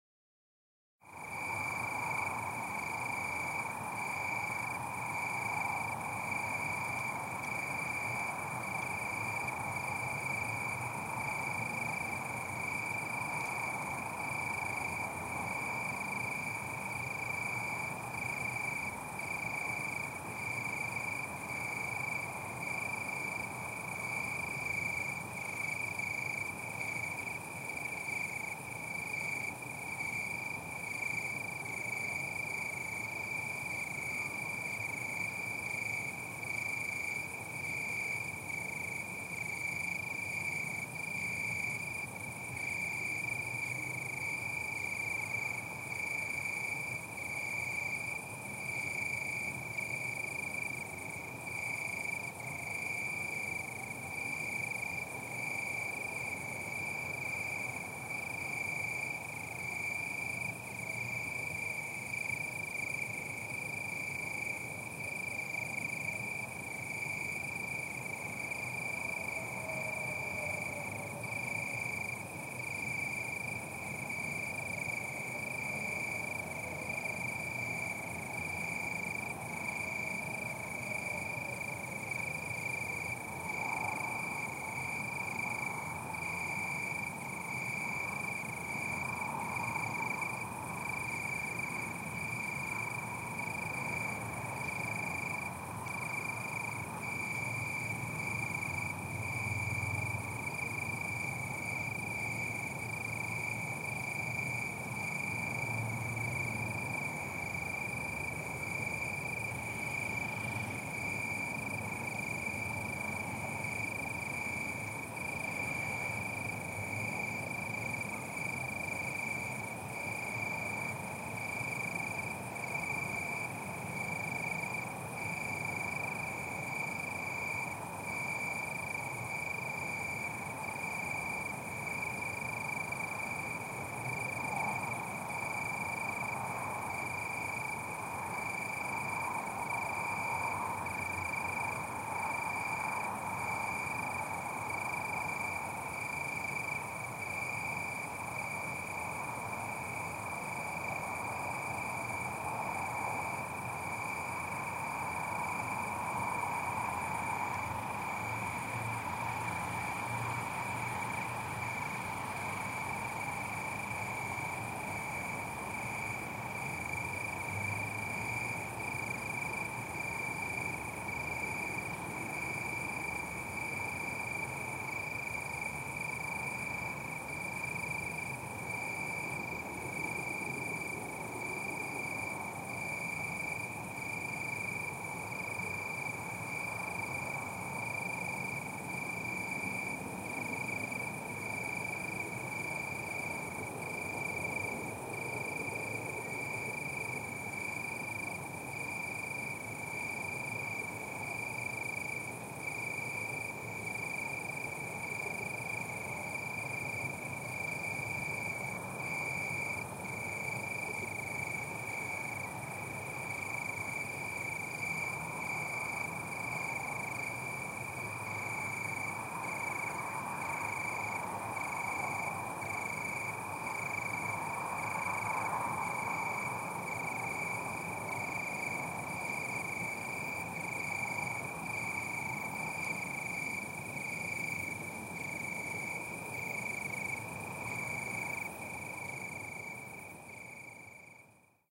Cricket in bush 2
Recording of the Prague ambiance in the evening.
Recorded at night in august, on the Prague periphery. Crickets, cars, trams in distance, steps, sirens.
Recroded with Sony stereo mic on HI-MD
prague, evening, praha, cricket, city, night, amb